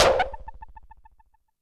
DRM syncussion german analog drum machine filtered thru metasonix modular filter.